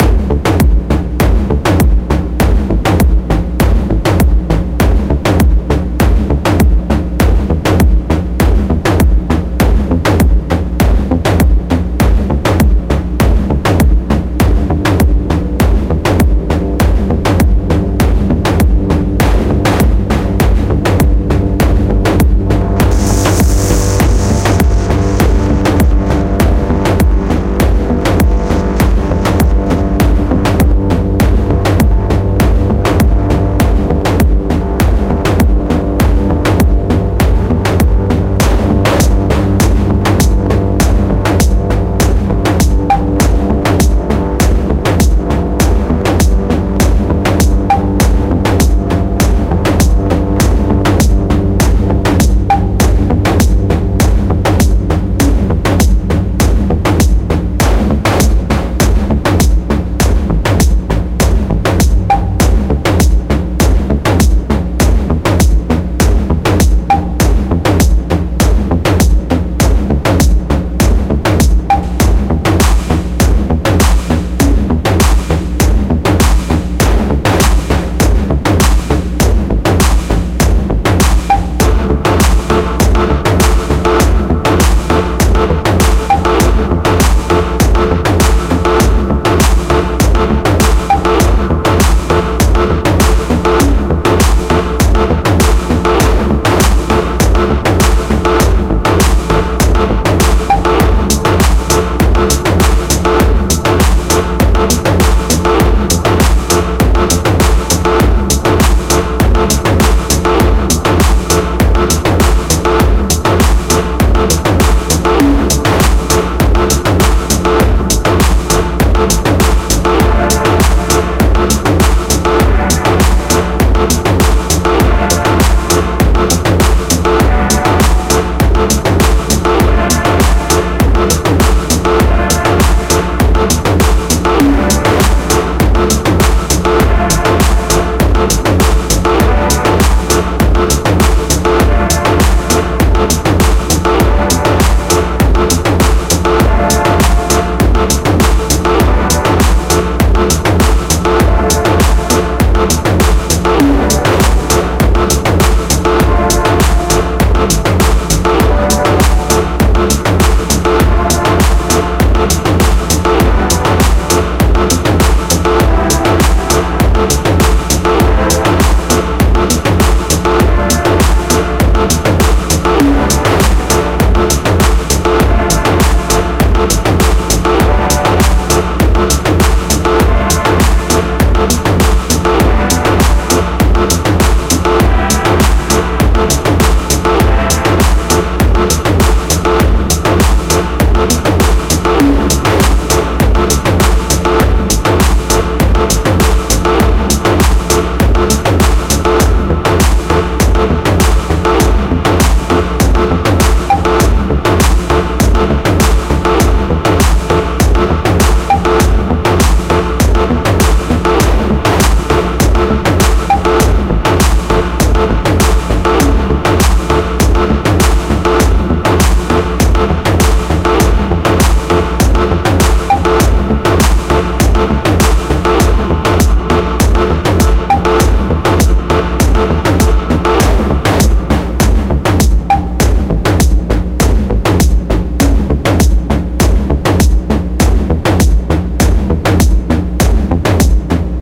Monster beats - EDM music .
100 bpm.
Synths: Ableton live,Sileth1,Massive